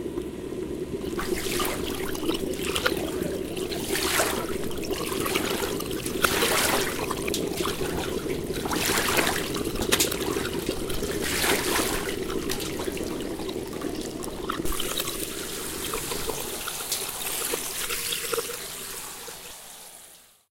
Water Sequence
flow, gurgle, drop, splash, drip, trickle, babbling, water, liquid